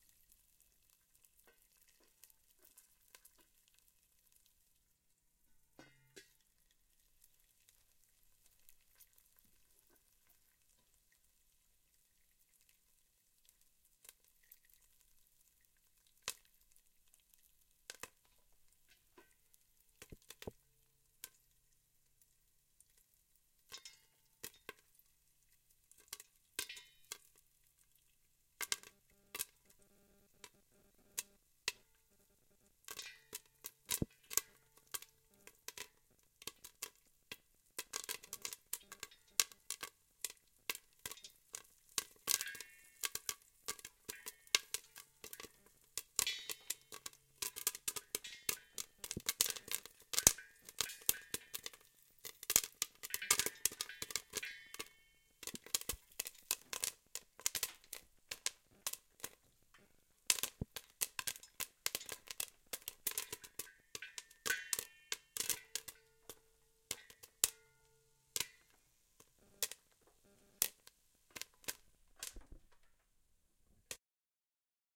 Popcorn sizzling in a pot and popping.